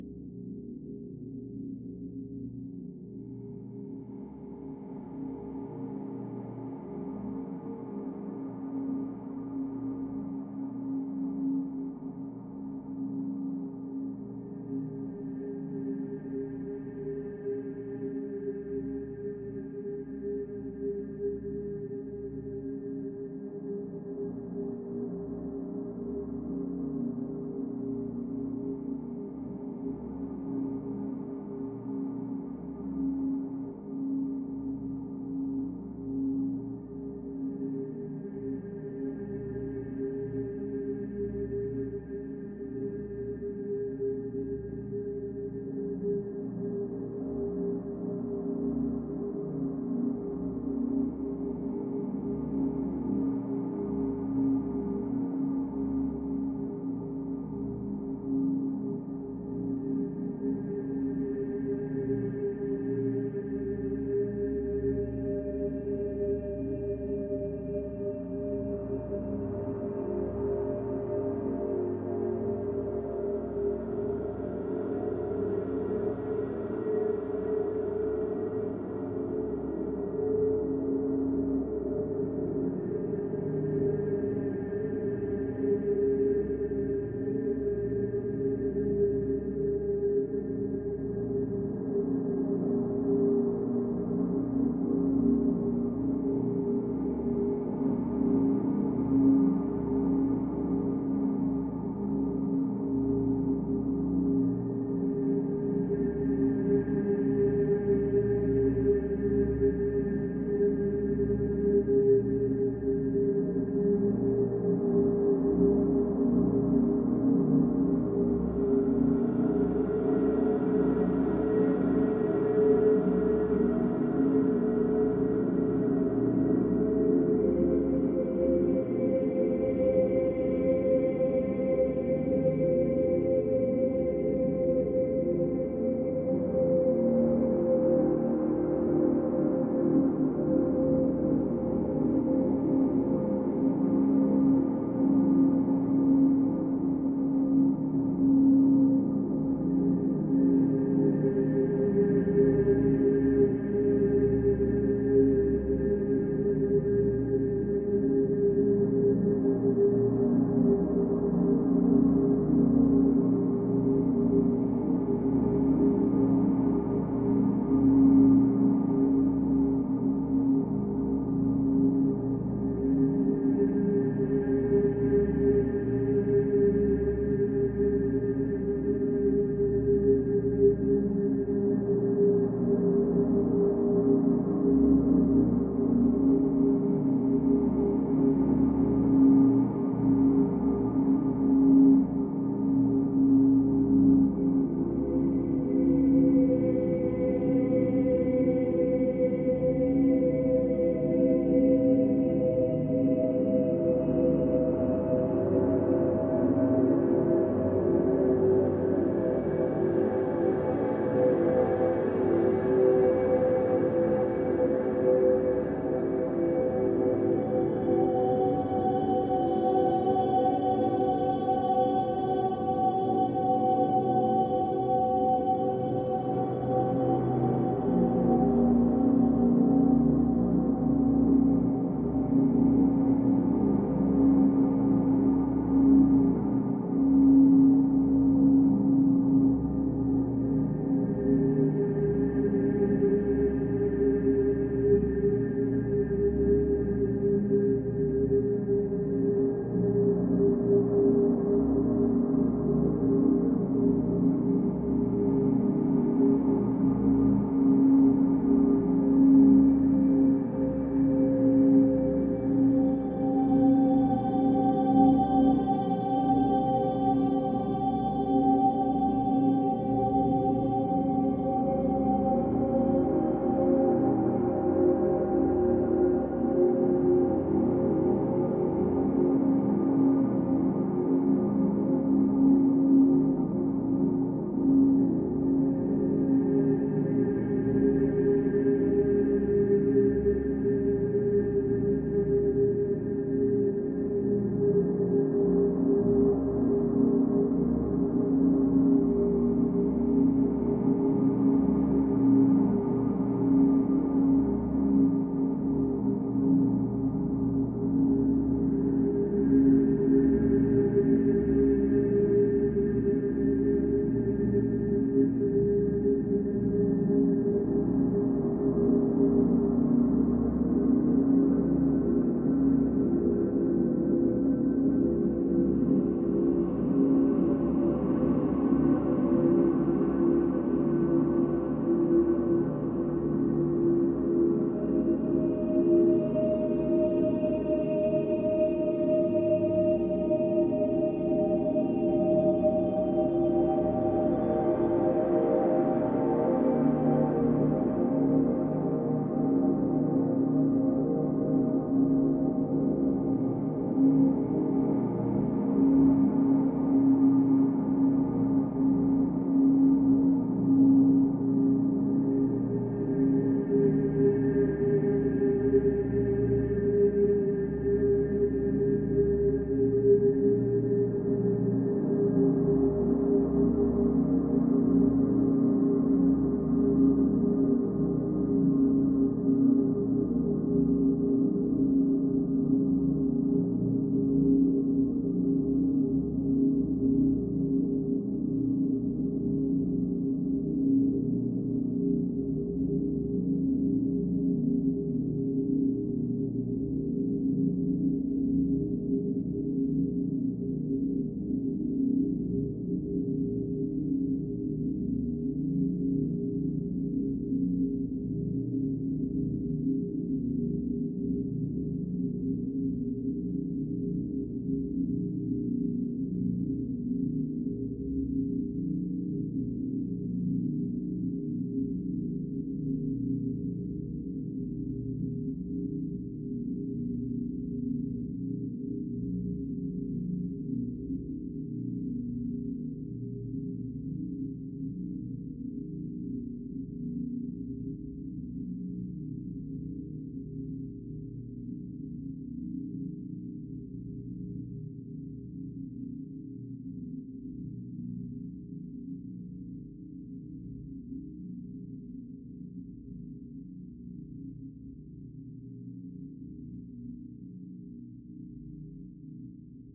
Future Garage (Ambient Textures) 01
Future Garage (Ambient Textures)
Opening/Ending
ambiance, Ambient, Dreamscape, Future, Garage, Textures, Wave